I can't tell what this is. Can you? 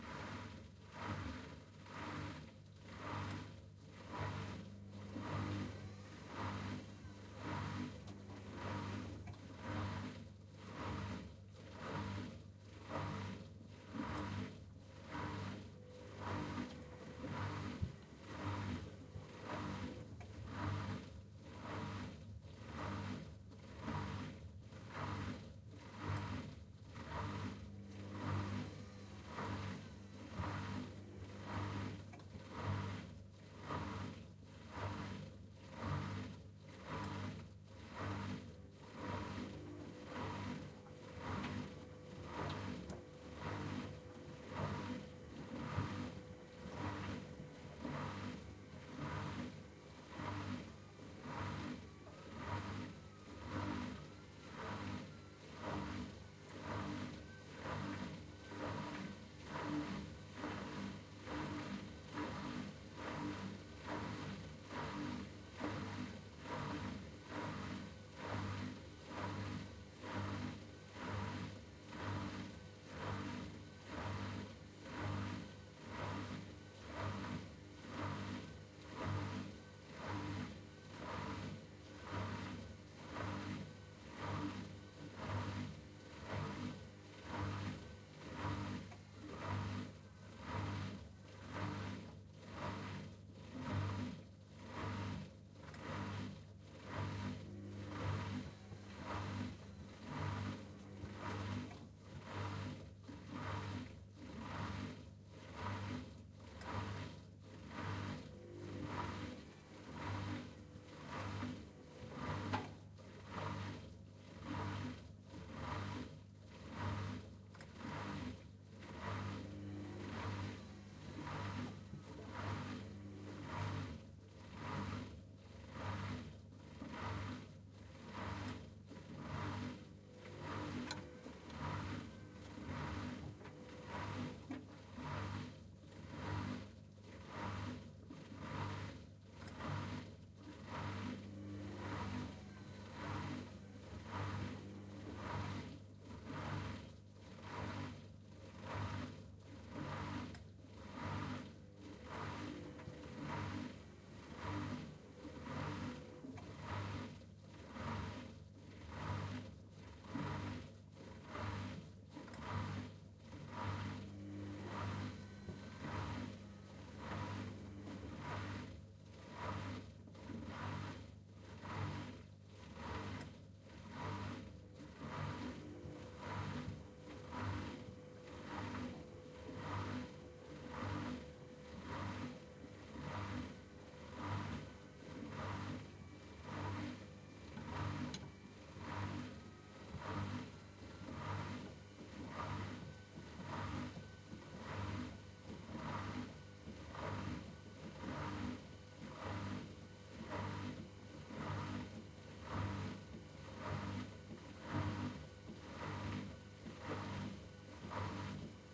washing machine pump
machine, pump, washing